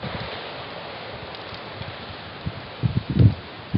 VNP lava
Standing five feet from lava slowly moving down a slope, near the ocean, and near Volcanoes National Park. You can hear the crackling of lava, which sounds similar to wood in a wood fireplace (but a little more hollow, or plastic-like). This recording is too windy for use in production, but can be used for research.
Recorded on 1 January 2013 with a Zoom H4. Light edits done in Logic.
field-recording, fire, lava, magma, volcano